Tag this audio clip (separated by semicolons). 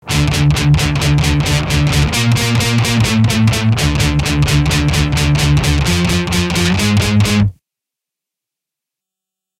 REVEREND-BJ-MCBRIDE; DUST-BOWL-METAL-SHOW; 2-IN-THE-CHEST